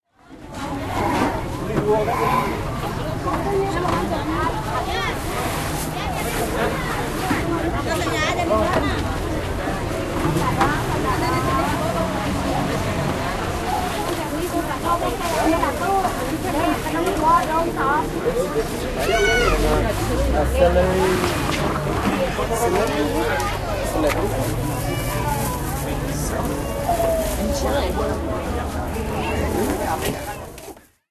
Recording made in a farmer's market near the town of Penebel, Bali.